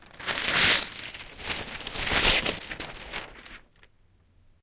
rustle.paper Tear 4
recordings of various rustling sounds with a stereo Audio Technica 853A
tear, rustle, cruble, rip, noise, scratch, paper